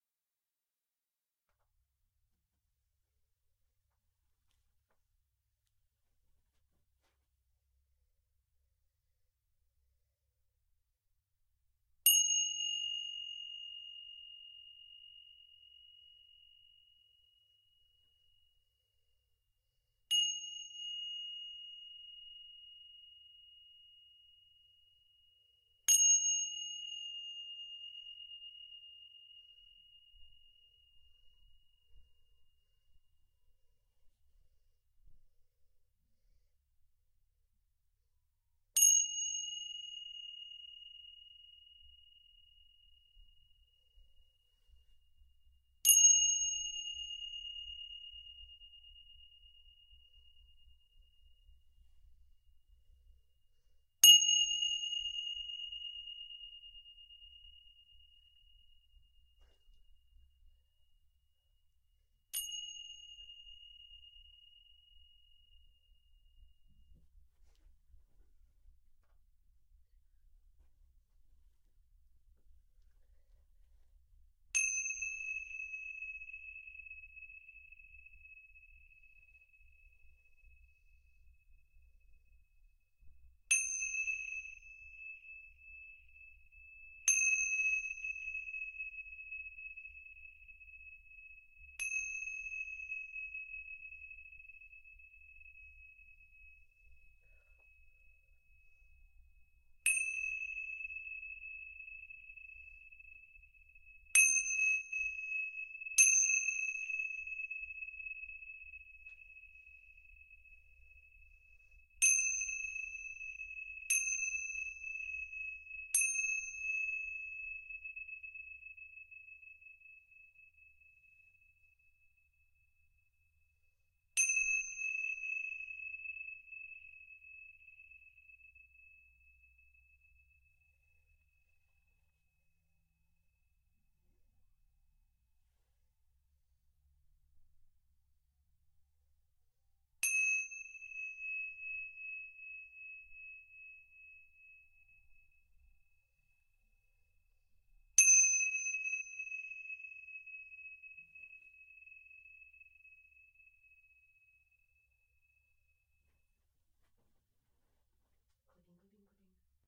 2 specific ting sha's different pitches, mulitple sample takes,all